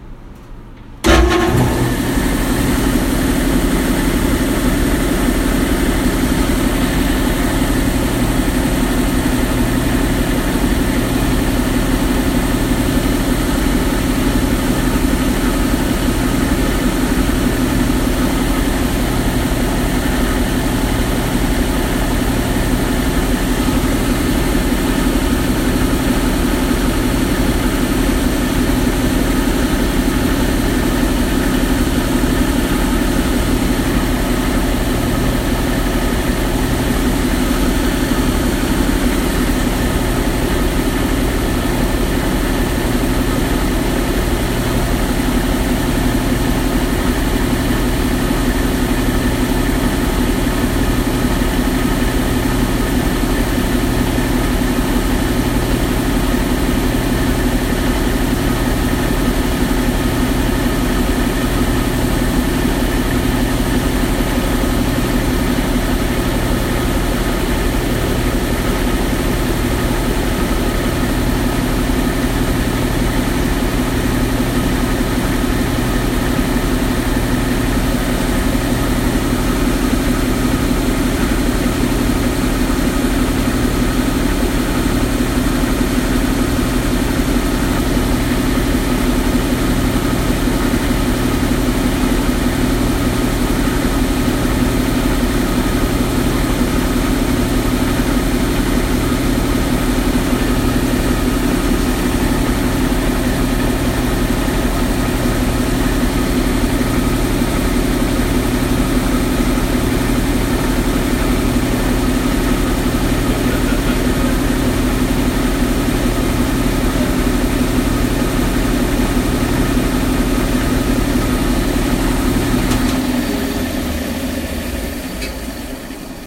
Sound of a sander running, including start and stop